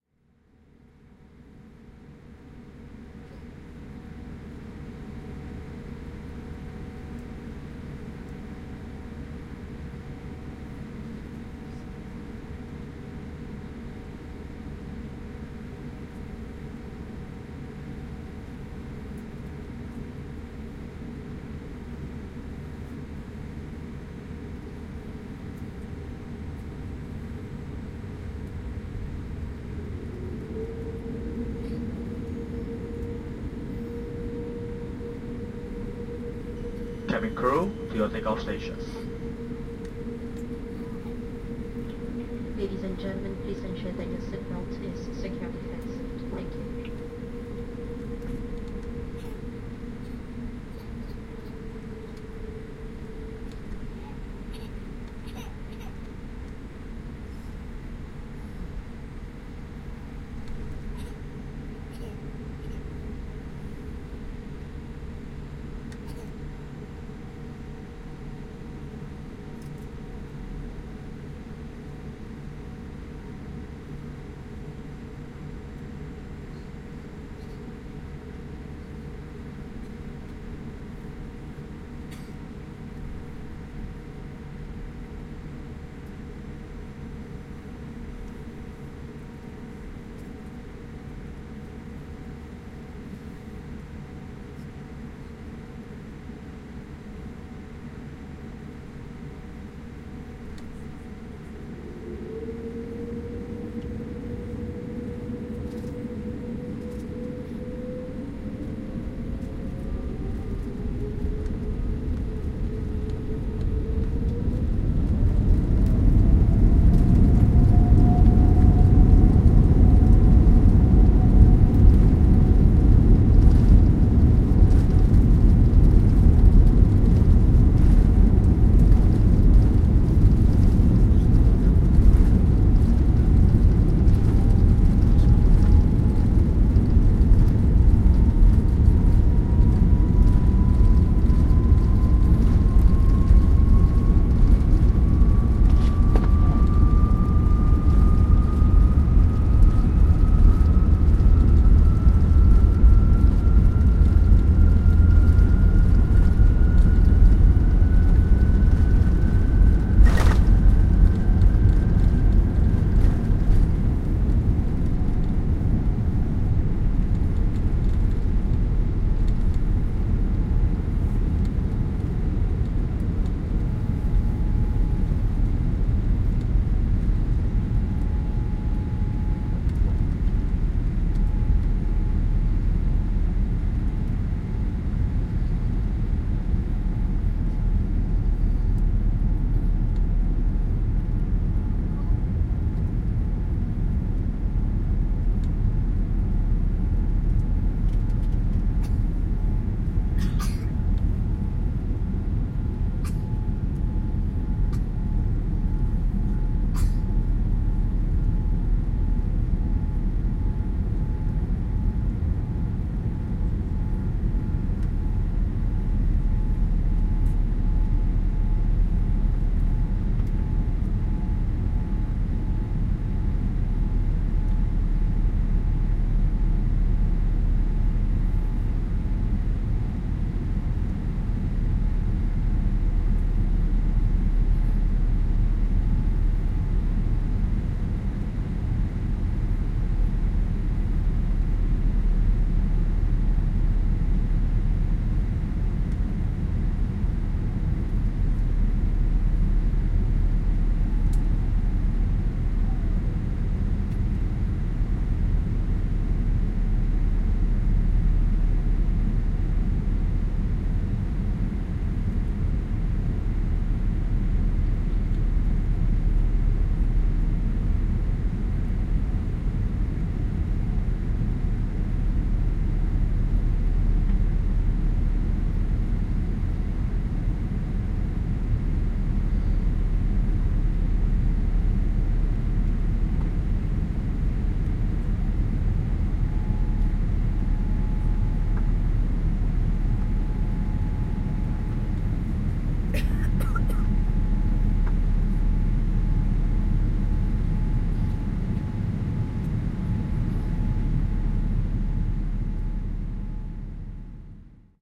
Plane taking off (Scoot airlines, Boeing 787-900, Singapore-Melbourne)